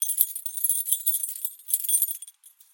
Jangling Flat Keys 3
Recording of me jangling flat keys together.
High frequency metal jingle.
Recorded with an Aston Origin condenser microphone.
Corrective Eq performed.
flat-keys, jangle, jangling, jingle, jingling, keys, metal, metallic, rattle, rattling, security